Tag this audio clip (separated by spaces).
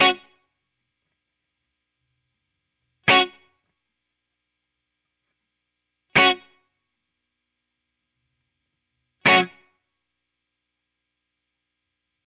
13 Reggae Roots Samples Modern Gbmin 078